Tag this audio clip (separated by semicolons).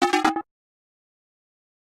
game; gameaudio; indiegame; sfx; Soundeffects